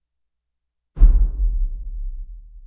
Intro Boom
This is a loud boom sound.
bang, boom, death, loud